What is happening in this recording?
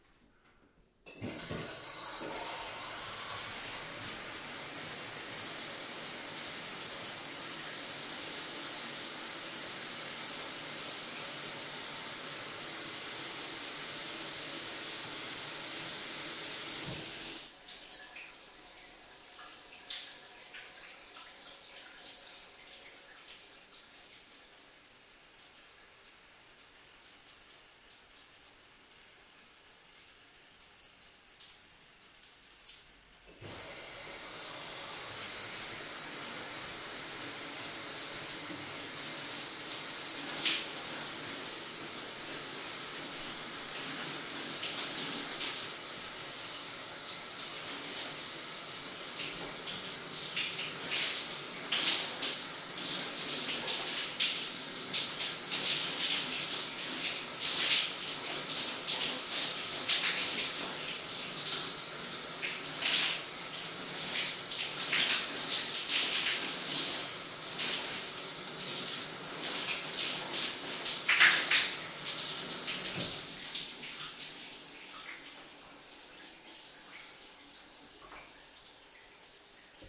The shower turns on runs for a bit, then it sounds as if someone is taking a shower, then it turns off.